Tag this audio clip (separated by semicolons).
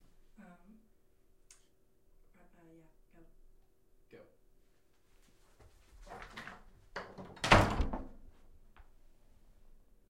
horror-effects; horror; slam; door